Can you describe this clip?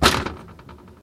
chainlink fence footstep 1
A footstep on a chainlink fence (Actually just banging a shoe on metal bars).
Recorded with a stereo Zoom H1 Handy Recorder.
footstep, foot, chainlink, fence, footsteps, step